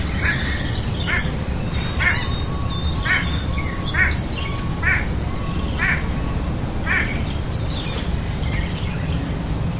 white bellied go away bird
Calls of a White-bellied Go-away-bird, with other birds in the background. Recorded with a Zoom H2
aviary, bird, birds, exotic, go-away-bird, jungle, rainforest, tropical, turaco, zoo